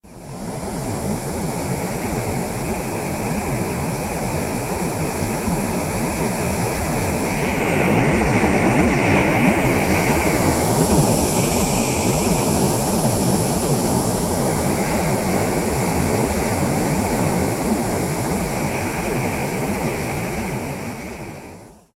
Simulated jet engine burner
Created by processing the sound of an old gasoline burner in Audacity